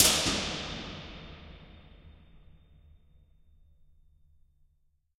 Concrete Tunnel 03
Impulse response of a long underground concrete tunnel. There are 7 impulses of this space in the pack.
IR, Tunnel, Reverb, Impulse, Response